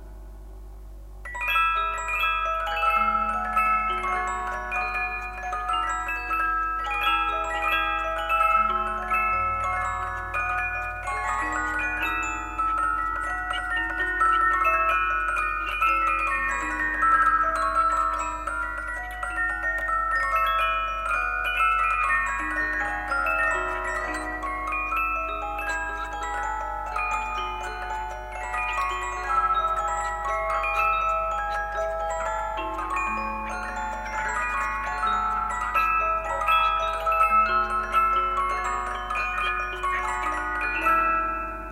A music box from Vienna
saga, lullaby, home, box, music, children